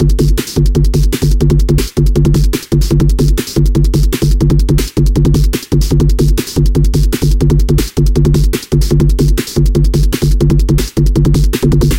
Agressivebeat80bpm
A fast electronic beat with some attitude 80bpm or 160 bpm
Beat, Filter